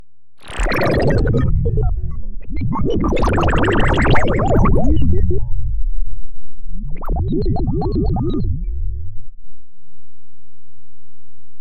Crystal underwater
I like those deep clear sounds.
underwater, deep, crystal